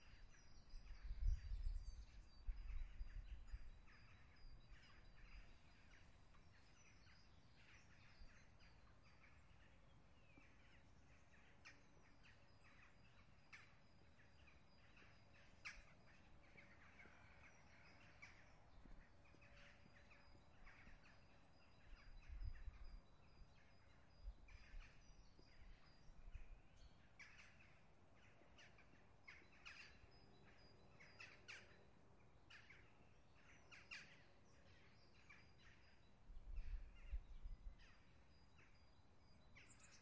bunch of birds
forest ambience recording